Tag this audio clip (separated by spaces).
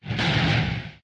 bang boom slam door